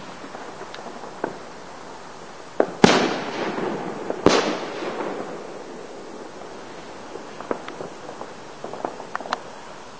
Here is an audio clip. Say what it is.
Double boom from a large firework exploding outside my house on fireworks night (5th of November '08).
One of those huge booms that echo off the houses and you feel it in your chest, recorded on a digital camera so it doesn't sound nearly as good.